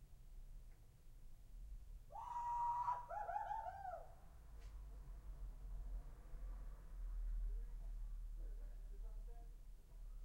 MIC-T 000006 GUEULARD
Man yelling in the street at night, recorded from interior, recorded with microtrack's T-Mic
street, yell